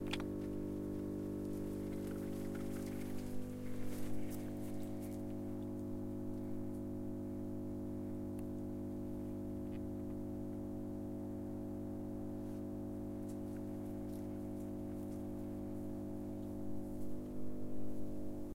Generator Power Loud Outdoor
The hum of a common public transformer, green reliable humming
buzz, coil, electric, electricity, generator, hum, power, transformer, vibrate